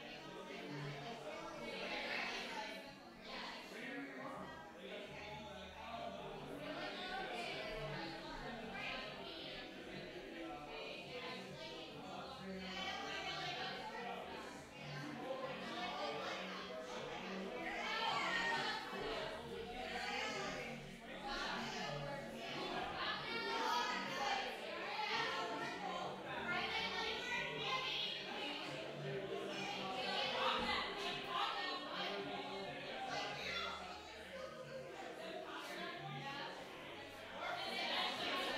Medium house party, distant walla